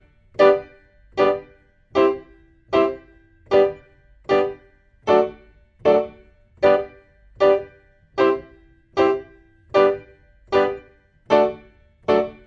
zulu77 G PIANO 2
Roots rasta reggae
Roots, reggae, rasta